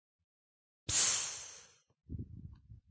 human voice "pzzz" sound
environment, human, voice